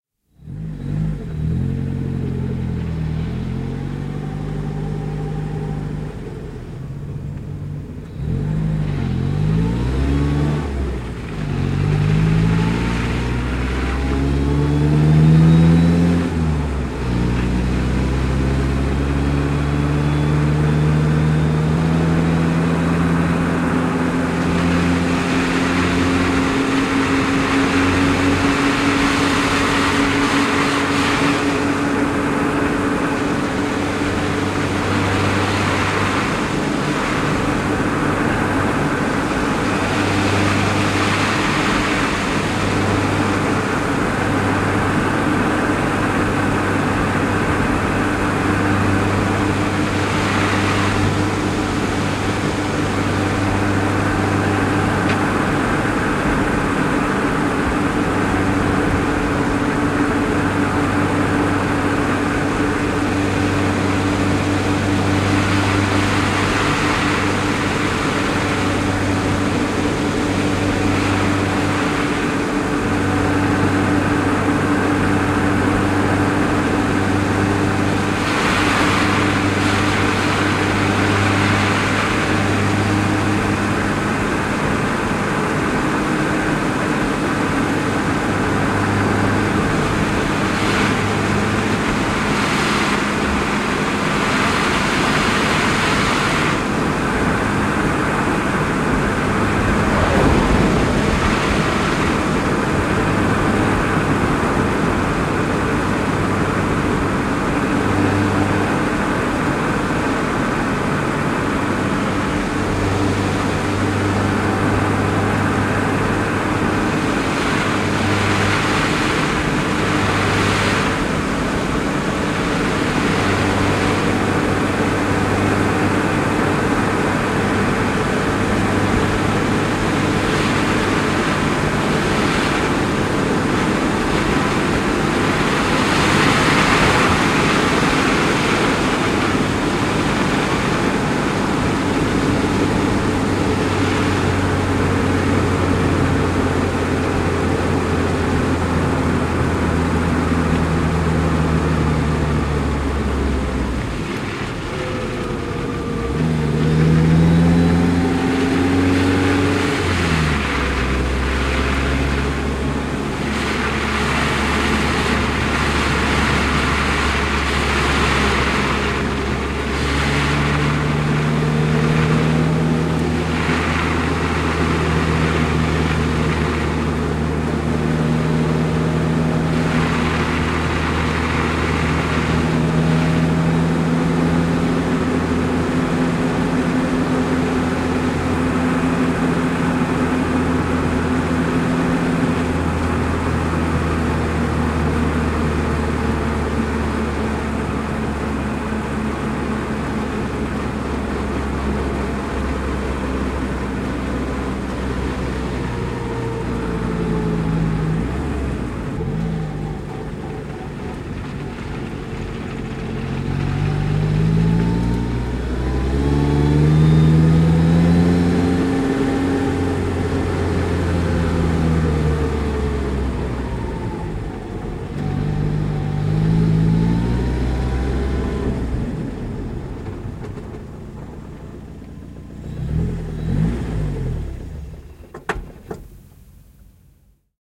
Henkilöauto, ajoa, loska / Car driving on a slushy road, stop, shut down, mic on a mudguard, exterior (Saab 900 GL, a 1983 model)
Auto loskaisella maantiellä, ajoa, pysähtyy, moottori sammuu. Mikrofoni lokasuojan päällä. Ulko. (Saab 900 GL, vm 1983).
Paikka/Place: Suomi / Finland / Pusula
Aika/Date: 12.12.1983